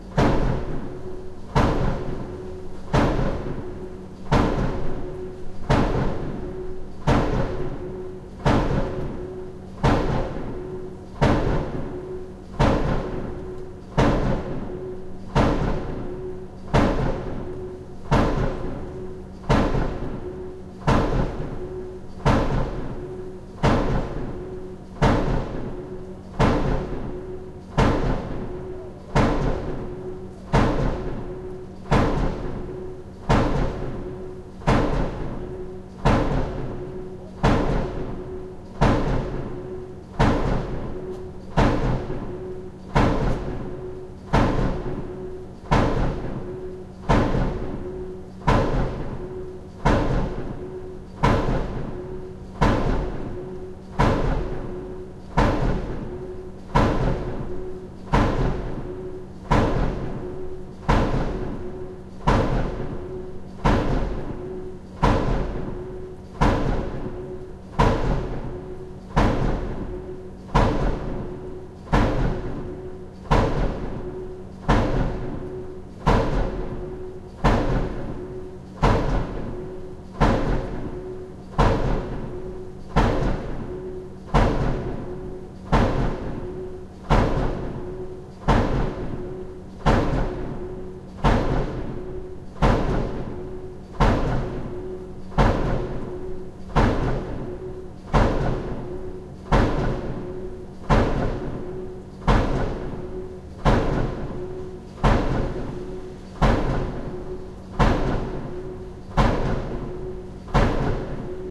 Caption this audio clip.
Fra mit vindue
Construction work recorded from my window summer 2006
bang, banging, construction, field-recording, hammering, hangover, industrial, loud, repetitive, trance, work